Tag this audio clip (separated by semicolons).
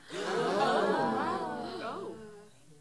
group; audience; studio; gasp; gasping; theatre; crowd; theater